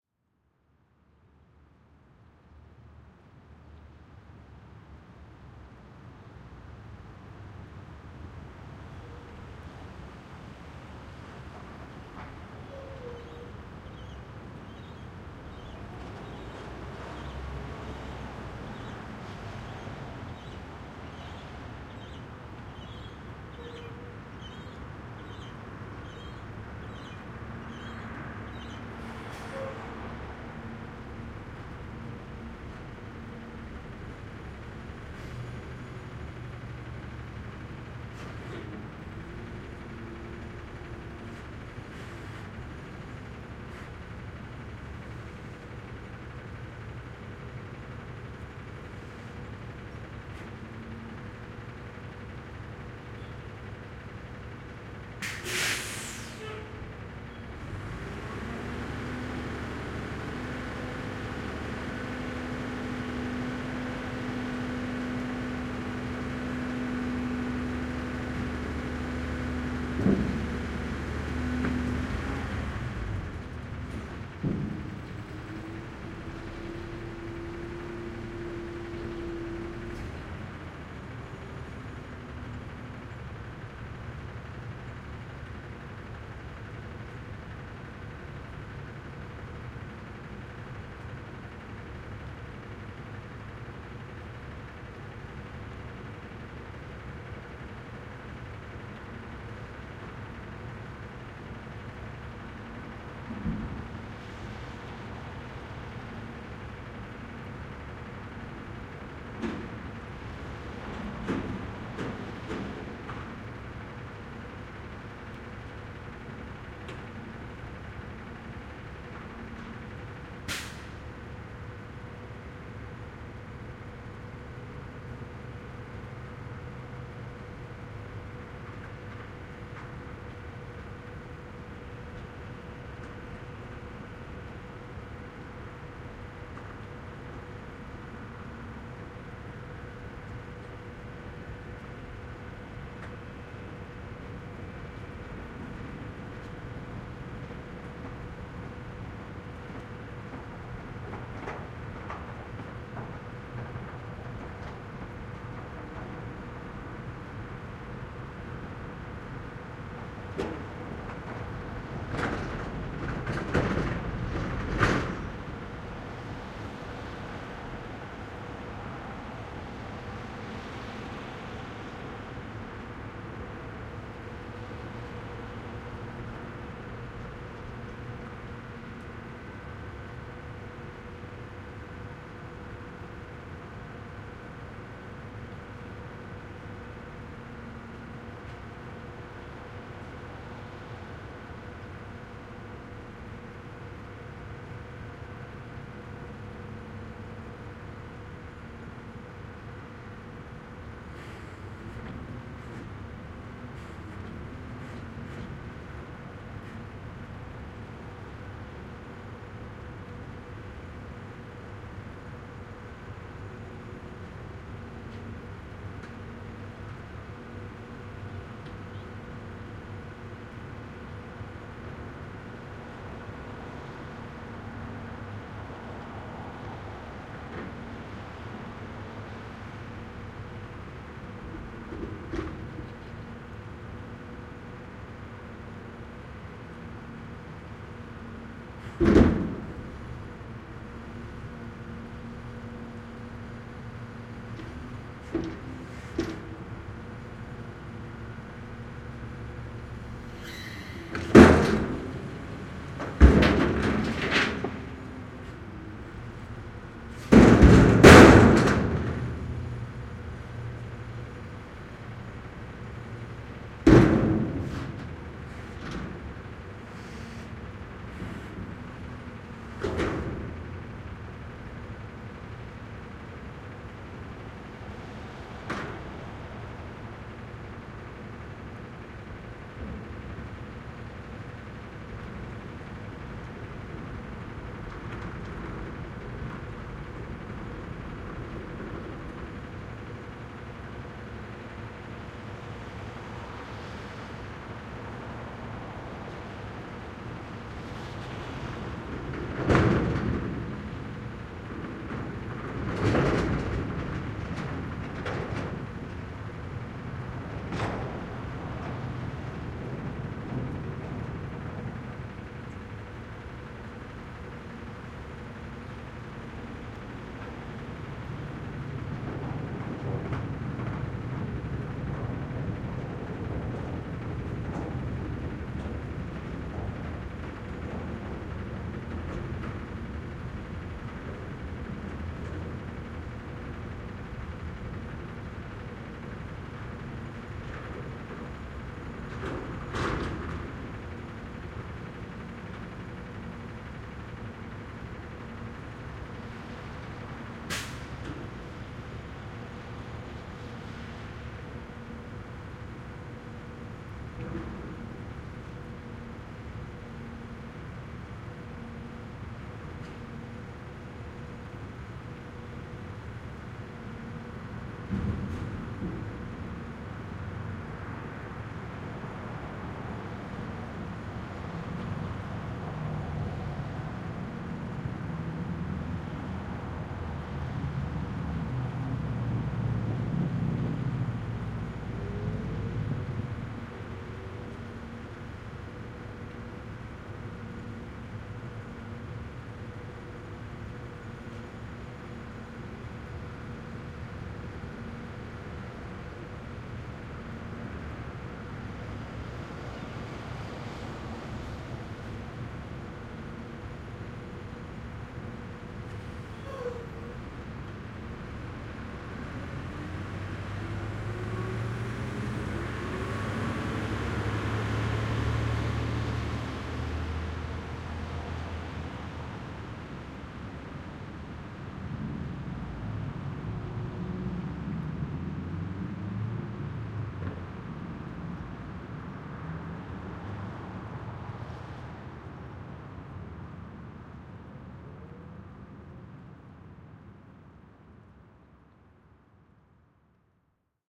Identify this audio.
VEHMisc garbage truck collecting stuff tk SASSMKH8020
A truck arrives to pick up the garbage/recycling. It empties the bins and then drives away.
Microphones: Sennheiser MKH 8020 in SASS
Recorder: Sound Devices 702t
bang, city, crash, engine, field-recording, garbage, life, loud, metal, noise, street, truck, urban